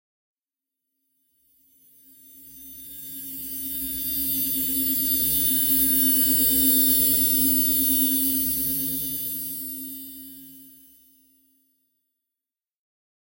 Ringing Saws
Pad sound that buzzes with a high pitched ring to it.
ambient, dark, dirge, edison, fl, flstudio, pad, soundscape